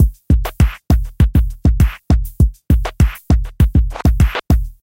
OMINOUS DRUM LOOP
drum loop with a dark like ambience
dark, drums, drumloop, percussion-loop, rhythm, loop, drum, beat